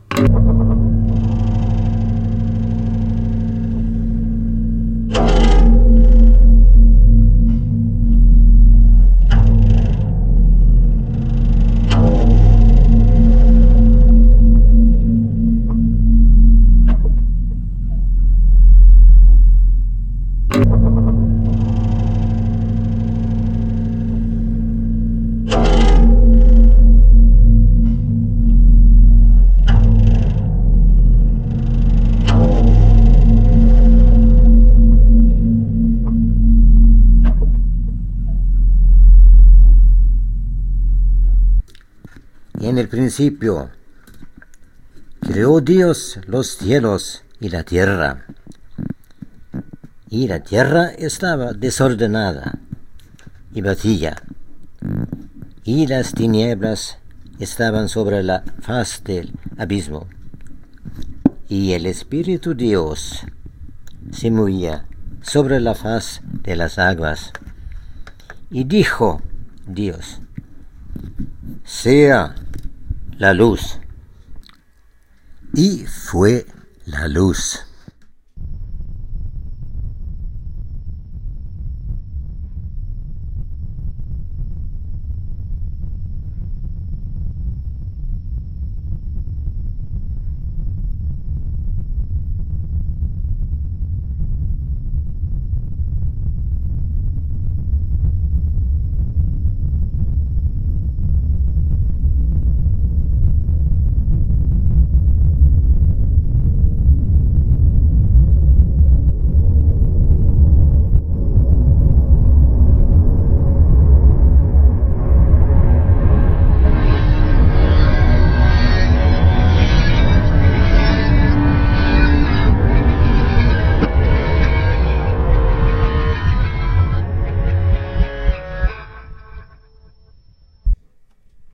Simply some seconds of harmony, that's all. click.
bass, nice, relaxing, scifi, tool, universal, usage